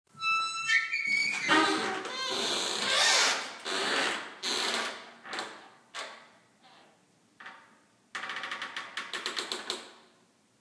Creaky Doors
Sound of a creaky old set of doors swinging after being pushed open. The second creak is from a local draft. Recorded on an iPhone...it's all I had at the time!
close, open, wood, soundscape, squeaking, hinge, eerie, creak, creaking, doors, door, creaky, wooden, spooky, squeak